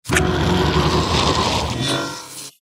A small mechanical collection of suction, wheezing and grunts to simulate a creature that requires a breathing apparatus